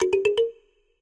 Rising notification sound.
The sound has been designed in Propellerhead's Reason 10.